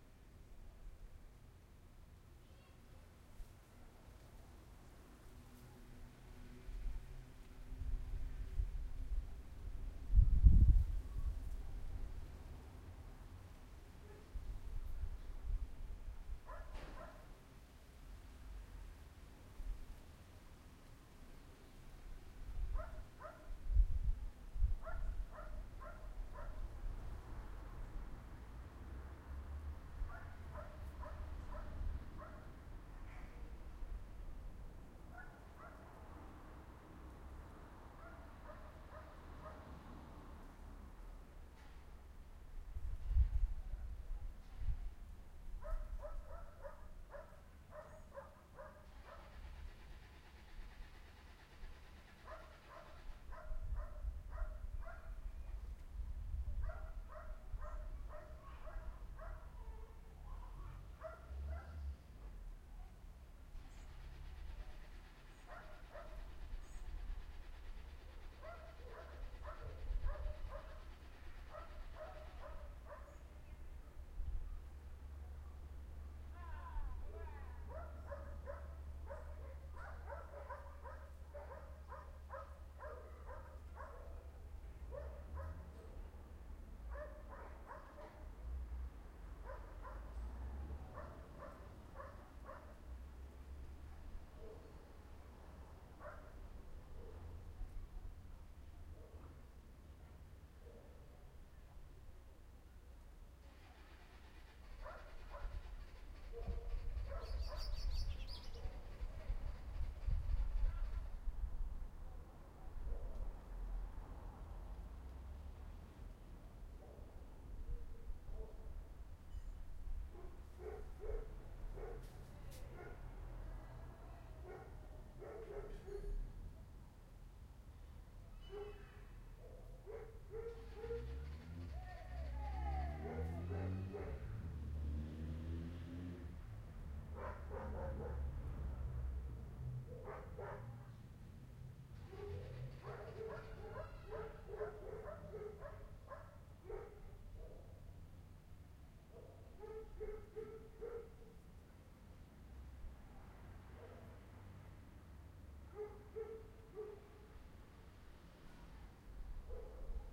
car starting and shouts far away
Someone trying to start a car, failing and then shouting. (repeats a few times)
Then the car starts and there are shouts of joi.
Recorded with a Zoom H1 fitted with a windshield, on 19 August 2016 around 19:00, in a village in portugal near Sintra.
revving, distant, yell, joy, shoutint, car, motor, frustration, starting-engine, engine, happy, field-recording